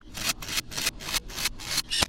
focus 01 copy
Autofocus on camera pitched and slowed down